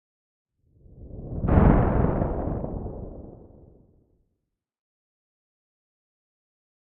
Synthesized Thunder Slow 02
Synthesized using a Korg microKorg